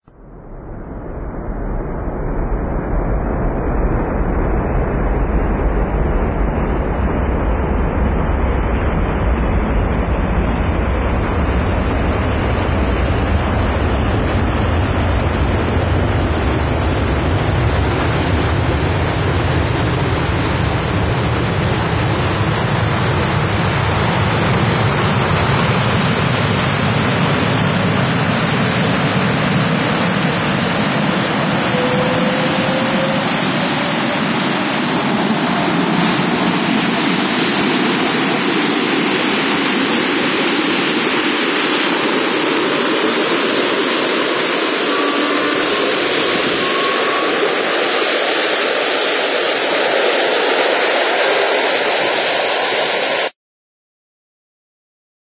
Black Hole
a electronic sound possibly for either getting sucked into gravity or trying to escape it.